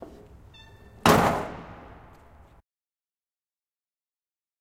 banging echoing 2-b
Recorded in an abandoned factory in Dublin.
noise, industrial